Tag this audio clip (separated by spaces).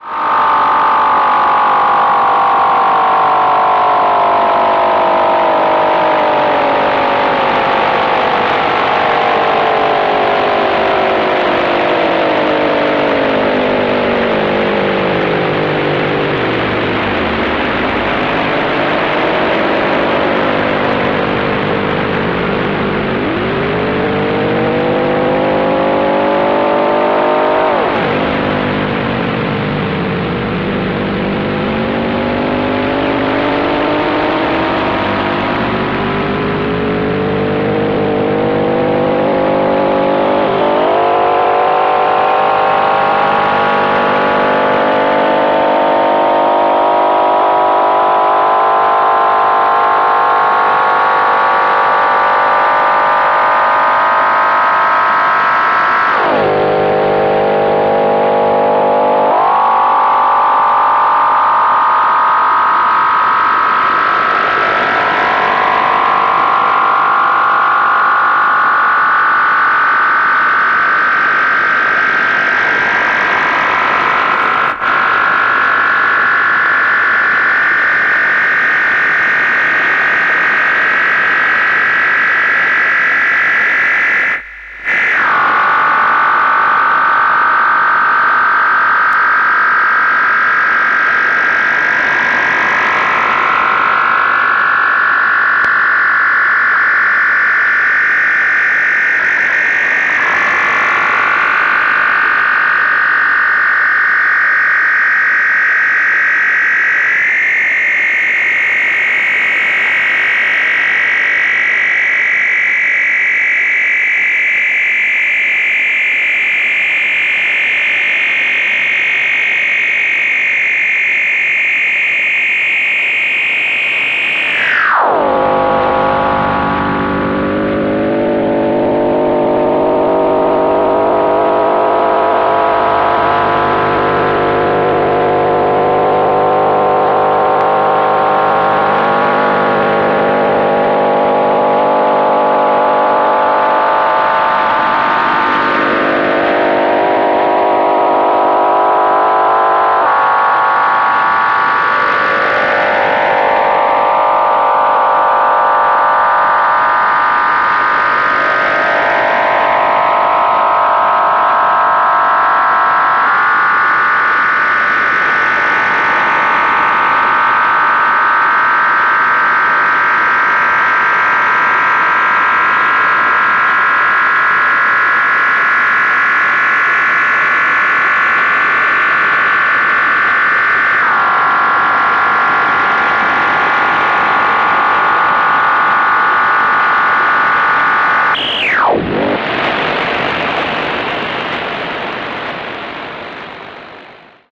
shortwave electronic radio dxing noise